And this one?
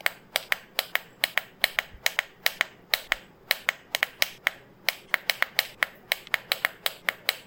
Pressing the Button of a Mosquito Killer Racquet
Sound of pressing the push button switch of a mosquito racquet. Recorded using Xiaomi 11 Lite NE. Removed noise in Audacity.
Recorded by Joseph
button, click, item, mosquito-bat, press, push, racquet, short, switch, tech